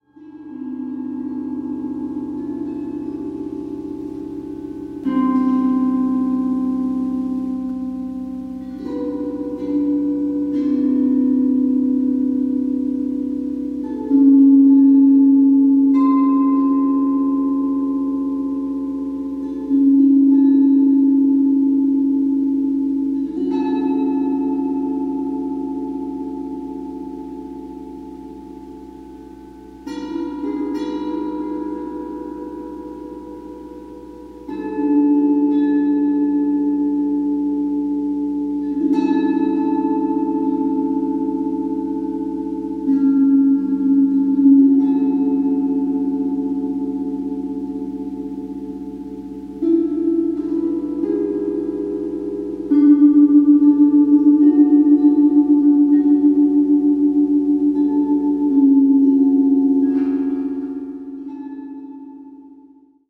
Ukulele played into a gong-microphone made with piezo transducers. No FX used. Incidentally, these are the chords to "Country Roads" by John Denver.
ambient, gong, plate, resonance, ukulele